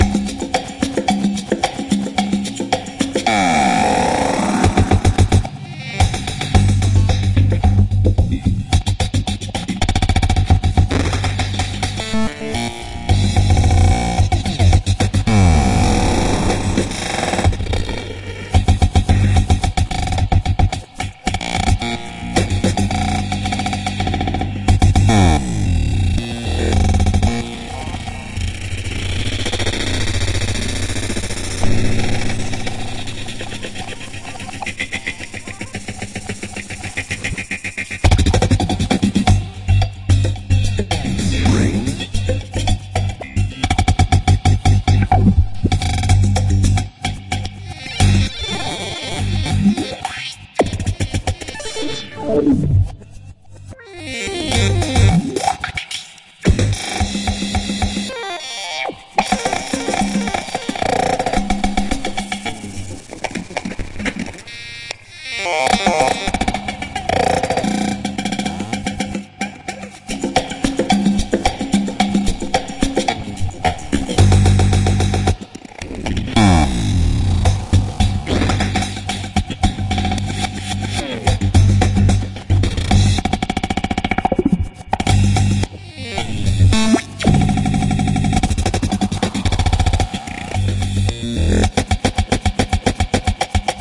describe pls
An expedition into a 8bar funk loop i composed.
Pointless mutilation of sound in search for something interesting.